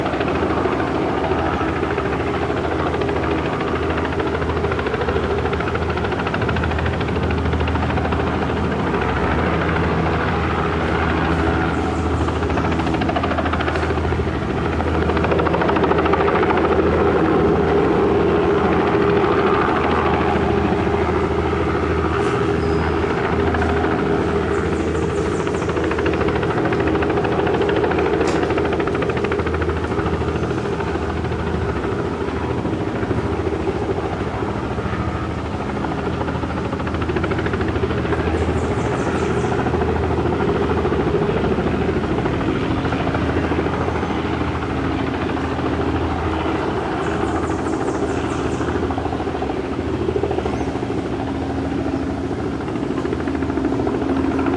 Police helicopter flying over Pavaozinho Mount in Ipanema, Rio de Janeiro, Brazil, on May 26, 2014, 4pm.